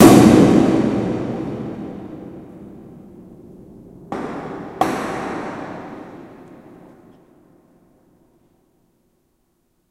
Big Sheet deep clack clack
All the sounds in this pack are the results of me playing with a big 8'x4' sheet of galvanised tin. I brushed, stroked, tapped hit, wobbled and moved the sheet about. These are some of the sounds I managed to create
hard, unprocessed, metal, metalic, experimental